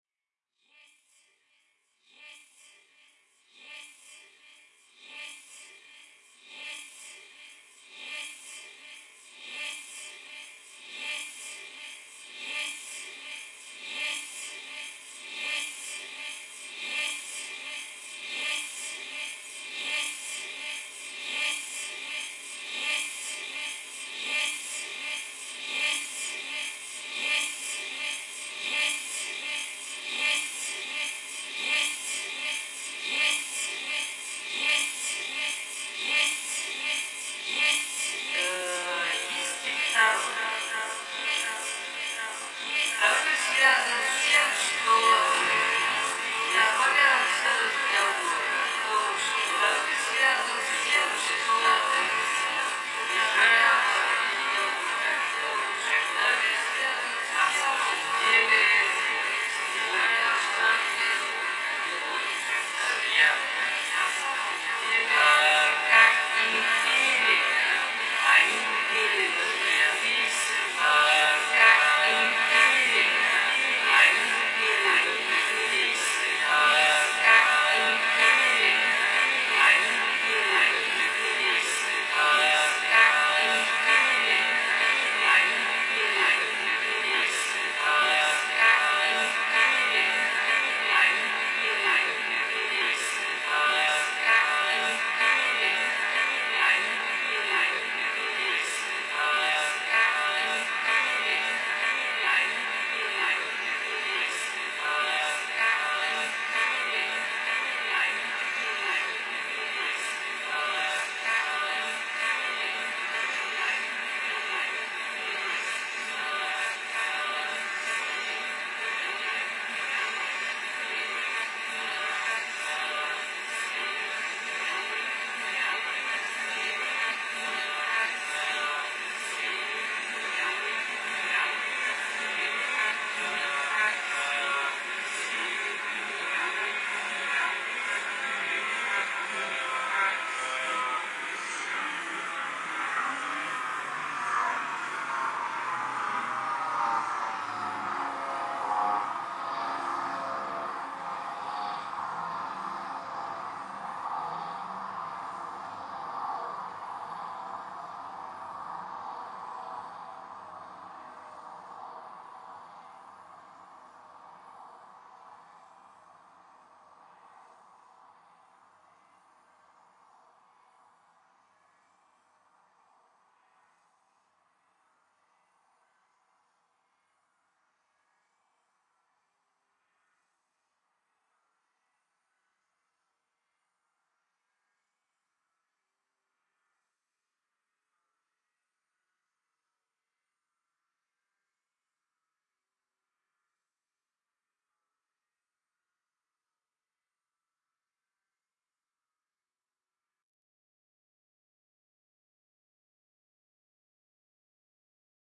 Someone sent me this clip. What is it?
furs voise 1
sample to the psychedelic and experimental music.